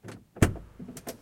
car door open peugeot 307
peugeot 307 door open
car, door, lock, unlock, vehicle